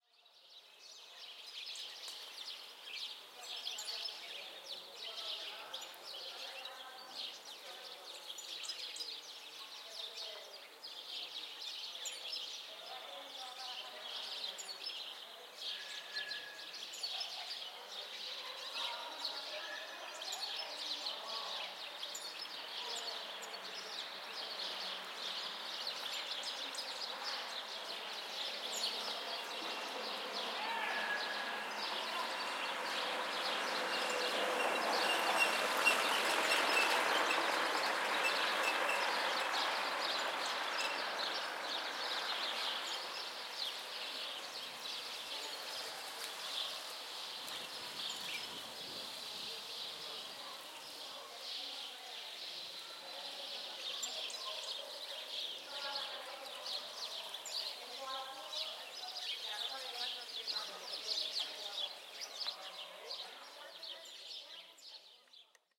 Alanis - Town Hall Square - Plaza del Ayuntamiento
Date: 23rd Feb 2013
This is the sound of the Town Hall Square in Alanis (Sevilla, Spain) in a cold but sunny winter morning. There are lots of birds singing in some shrubs. Two old ladies talk in the background.
Gear: Zoom H4N, windscreen
Fecha: 23 de febrero de 2013
Este es el sonido de la Plaza del Ayuntamiento en Alanís (Sevilla, España) una mañana de invierno fría, aunque soleada. Hay muchos pájaros cantando en unos arbustos. Dos señoras hablan en el fondo.
Equipo: Zoom H4N, antiviento
Alanis, Espana, Sevilla, Seville, Spain, field-recording, grabacion-de-campo, naturaleza, nature, paisaje, pajaros, plaza, pueblo, soundscape, square, village